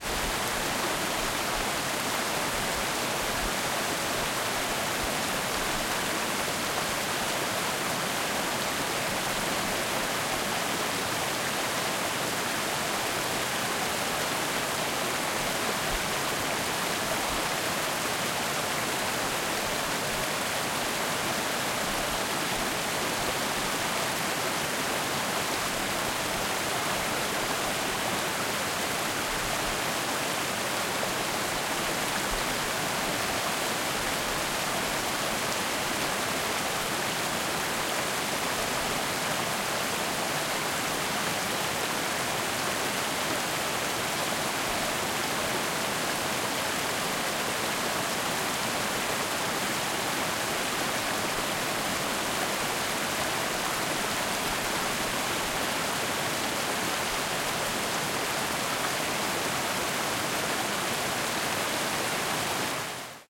River Rapid Vinstrommen 5
Recording of Vinstrommen in the river Voxnan in Sweden with very high water level.
Equipment used: Zoom H4, internal mice.
Date: 14/08/2015
Location: Vinstrommen, Voxnan, Sweden
Rapid, River, Stream, Water, White-Water